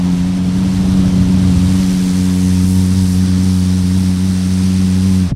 Imitation of distorted bass sound using mouth